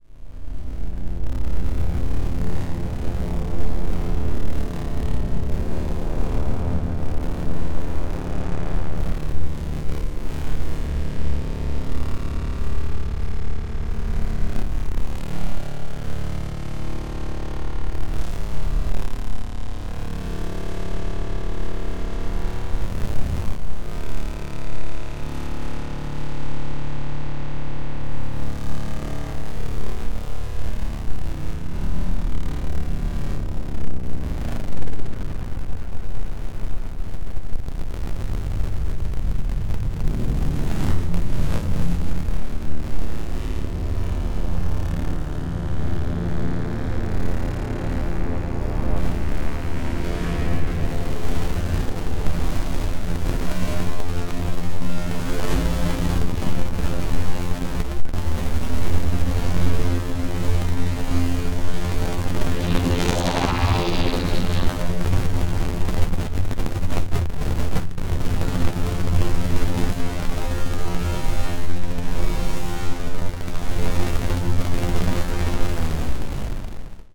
Bakteria Menace 5
Space bakteria has finally arrived to menace and threaten your neighborhood, relatives, and pets.